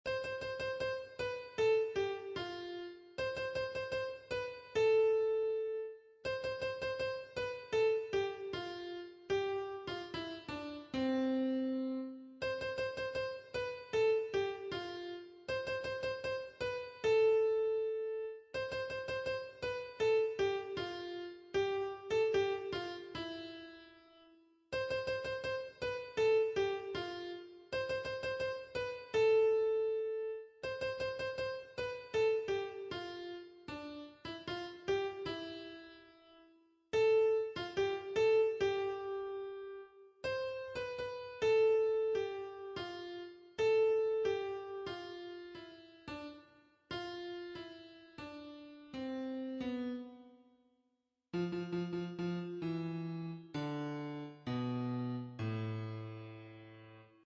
A melancholy little melody. Represents parting ways or saying goodbye. (Please share work used in, thank you)
intro-outro, piano, sad, short-sweet, synthetic